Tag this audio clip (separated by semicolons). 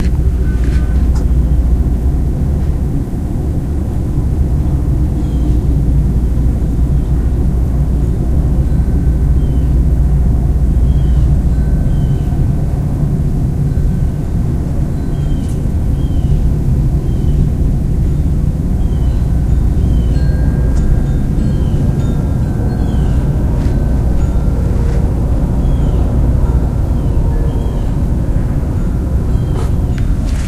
digital; electet; field-recording; microphone; tadpoles; test